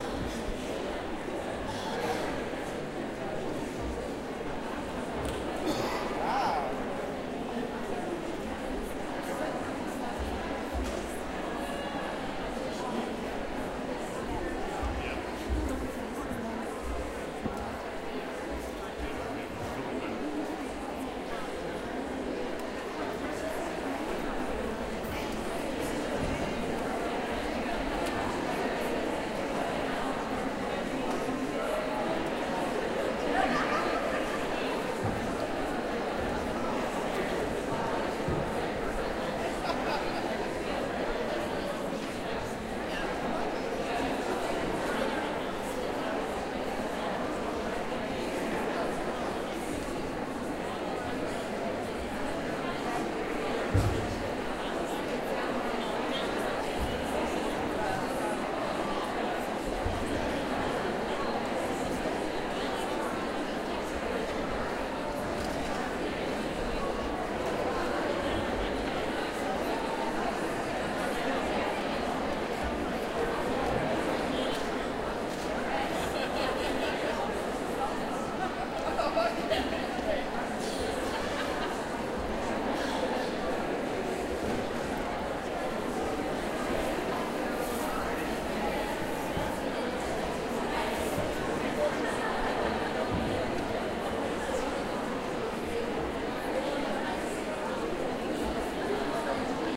Recorded from a balcony above one of the theaters at Parco della Musica auditorium in Rome as people start to take their seats for a conference.